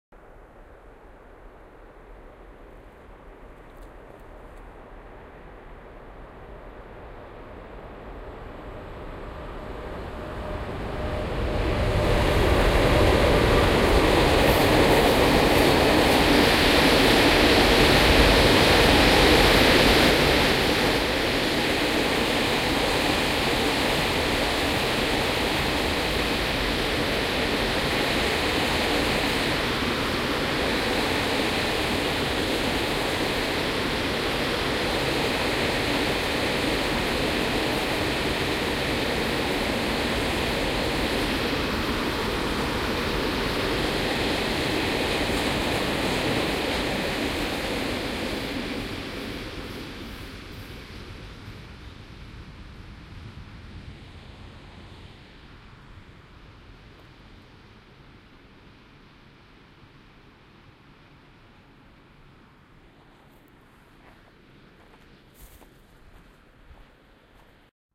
Binaural Train Passing By
Field recording of an electric train passing by. Recorded fairly close, wide dynamic range. I had to reduce the input gain during the middle section of the recording to avoid overloading the ADC.
I think this a fairly good example of the spatial image binaural recording can provide.
Gear: Sharp Minidisc, Soundman OKM binaural microphones.
binaural, field-recording, railway, train